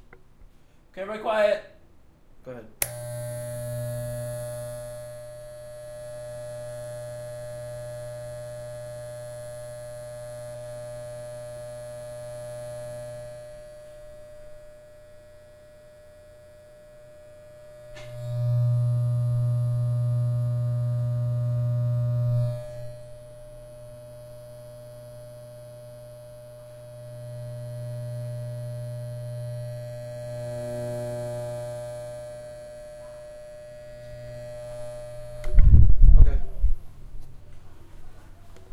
Hair Clippers 2
Hair Clippers Buzzers Trimmers in a Barbershop
Close, barbershop, Clippers, Hair, a, Range